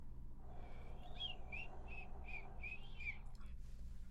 Morning soundsu
Trying to do sounds of morning
birds, foley, gust, Morning, wind